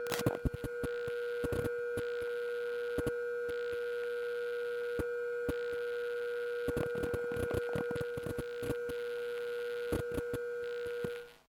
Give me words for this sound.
PGJ TelPickupCoil Recording Raw.02
In the spirit of the jam, as a thank you for the chance to be a part of such an awesome event and to meet new incredibly talented people, I decided to give away for free some samples of recording I did of electric current and some final SFX that were used in the game. I hope you find these useful!
telephone-pickup-coil, noise, electricity, electric-current